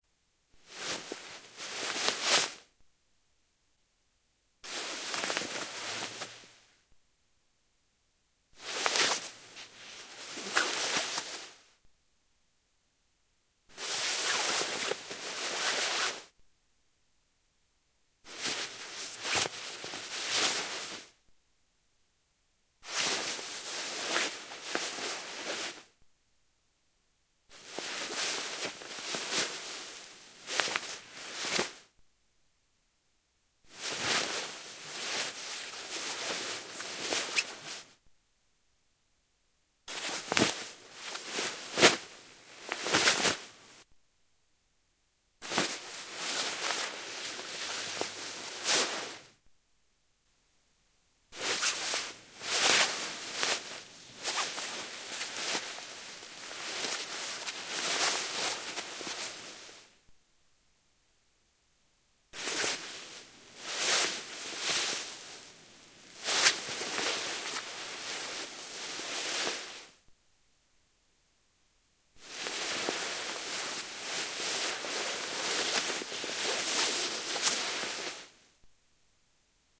Clothing Rustle Acrylic